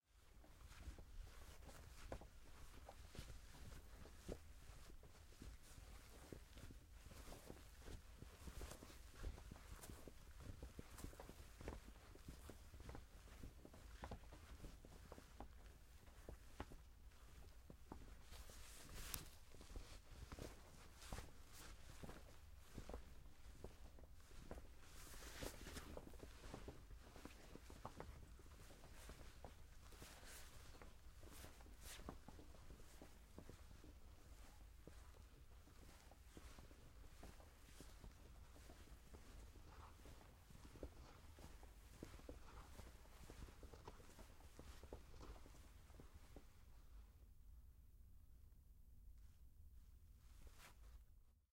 Mandy Cloth Pass Jacket Walking
The sound of a jacket swaying back and forth as someone walks.